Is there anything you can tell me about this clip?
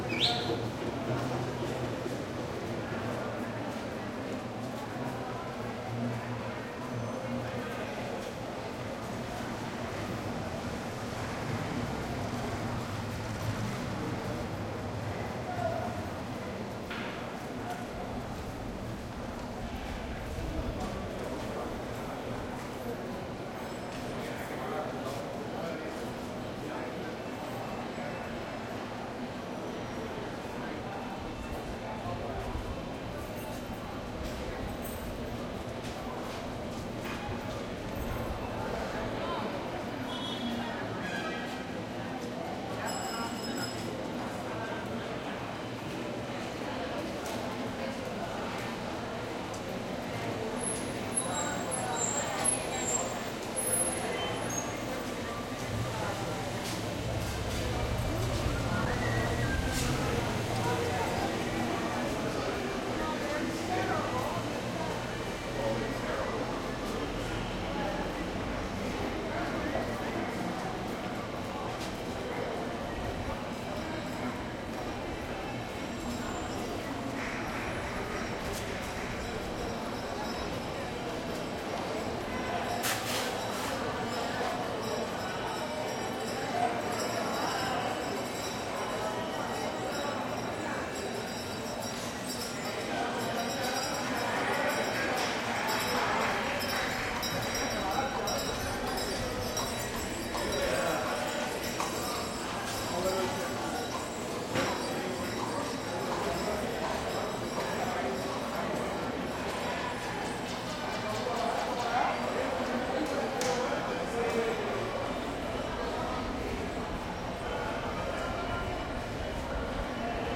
crowd ext medium tourists Old Havana nearby heavy echo from window1 Havana, Cuba 2008
crowd ext medium tourists Old Havana street nearby echo from balcony1 Havana, Cuba 2008
tourists, street, echo, ext, balcony, crowd, old, Cuba